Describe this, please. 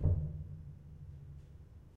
Pedal 18-16bit
piano, ambience, pedal, hammer, keys, pedal-press, bench, piano-bench, noise, background, creaks, stereo
noise, background, ambience, piano, stereo, bench, keys, piano-bench, pedal, pedal-press, creaks, hammer